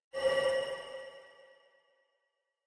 Ice spell cast. For a Videogame, created with Logic's Sculpture Synthesizer.
Cast, chimes, fairy, jingle, magic, metalic, pipe, sparkly, spell